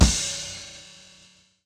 One shots stripped from old band practice cassettes on boombox with built in mic or realistic tape deck through headphones...
crash, drum, kick, kit, lofi